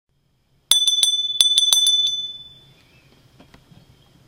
Small Glass Bell 2
A beautiful Glass Bell
Beautiful, Bell, Glass